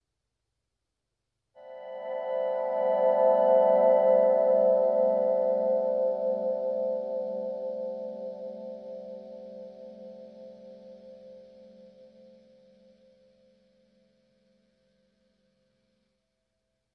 A simple fade in using my volume knob.
Gear used:
Vox Tonelab SE, Ibanez UV777 packed with Seymour Duncan SH, Tascam DR-05.